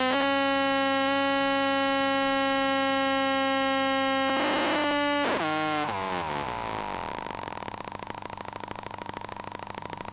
A long beep from the Mute-Synth-2 breaks down, pitches down and eventually turns to a sucession of individual clicks (pulses).